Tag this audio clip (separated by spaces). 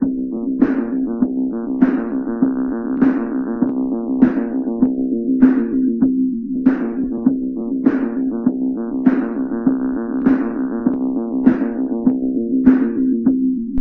bass dance electro industrial loop minimal simple techno